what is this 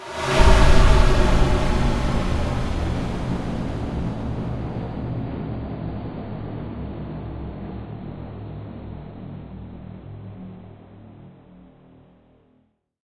A triggering sound best suited for puzzle completion or soft jump scares. Made/Generated in Audacity
audacity, boom, cinematic, creepy, fear, ghast, ghost, haunted, horror, oh-no, phantom, scare, scary, something, spooky, sudden, suspense, terrifying, terror, thrill, went, wrong